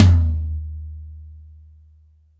Yamaha Beech Custom Tom Low
Hard stick hit on Yamaha Beech Custom drum kit tom